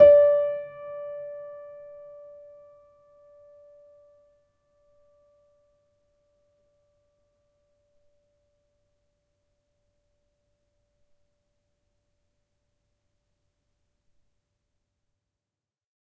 upright choiseul piano multisample recorded using zoom H4n
choiseul, multisample, piano, upright